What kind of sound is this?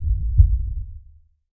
This is a heart beat that I have created and edited out of my voice.